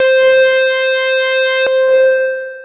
K5005 multisample 01 Sawscape C4
This sample is part of the "K5005 multisample 01 Sawscape" sample pack.
It is a multisample to import into your favorite sampler. It is a patch
based on saw waves with some reverb
on it and can be used as short pad sound unless you loop it of course.
In the sample pack there are 16 samples evenly spread across 5 octaves
(C1 till C6). The note in the sample name (C, E or G#) does indicate
the pitch of the sound. The sound was created with the K5005 ensemble
from the user library of Reaktor. After that normalizing and fades were applied within Cubase SX.